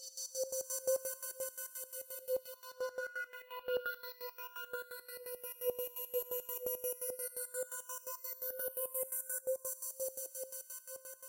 drum and bass FX atmosphere dnb 170 BPM key C